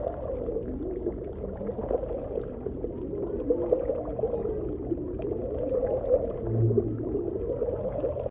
TAKHALLOUFT FARRAH LPCIM2018 Underwater
For this sound, i recorded a water fountain, and i've added some effects to make it sounds like it was under water.
For the effect i used the Wahwah effect?
In french
Frequence 0,5
Phase de départ LFO 0
Profondeur 10%
Résonnance 5,1
Décalage de fréquence 30%
In english
Frequency 0.5
LFO start phase 0
Depth 10%
Resonance 5.1
Frequency offset 30%
relaxing, sound, recording, ambient, liquid, water, trickle, field, creek, flow, river, stream, under